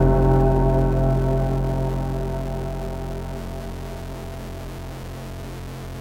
STM3 grind drone soft
How grind_drone_hard sounded before being over processed. Softer... quieter.
distortion, static